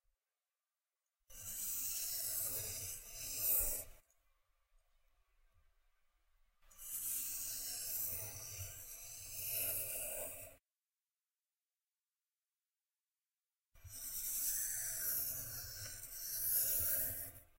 A continuous and slow pencil sliding on paper sound. I drew a circle shape and then a line.